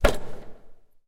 snd ImpactSmallWall03
metal impact of a wheelchair with wall, recorded with a TASCAM DR100